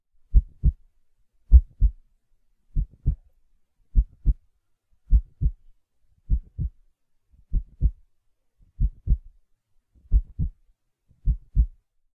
Heart Beating
A steady heartbeat.